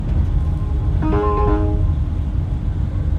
LNER Azuma Call for Aid
The sound played on LNER Azuma trains in the UK when the Call for Help button is pressed in one of the onboard toilets.
alarm, alert, effect, help, jingle, ring, tone, train